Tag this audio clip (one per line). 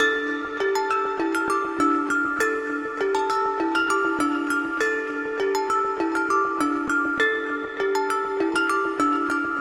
100bpm
bellish
groovy
hook
hypnotic
loop
mallet
melodic
metal
music
percussive
processed
sequence